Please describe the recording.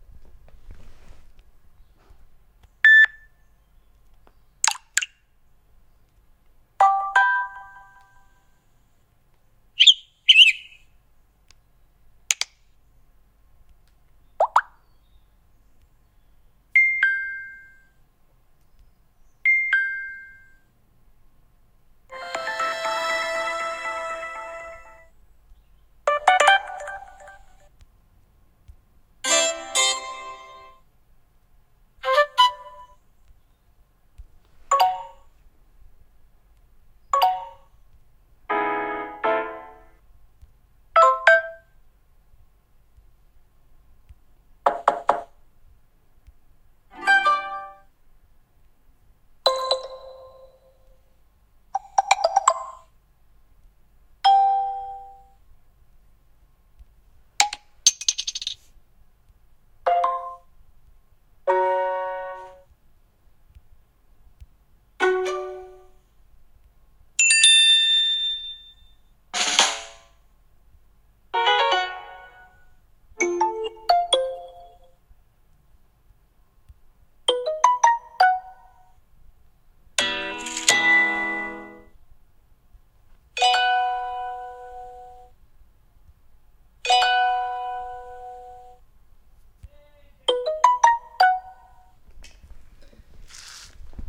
Different text / alert noises on phone.